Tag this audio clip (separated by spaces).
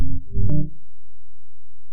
deep loop tech